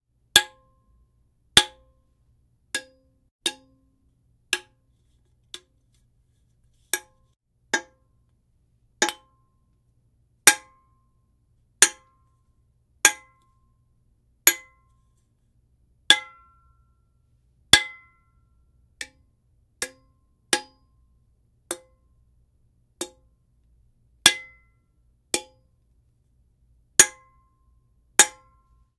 pop can hits
Small metallic impacts from hitting an empty aluminium can.
can, metal, impact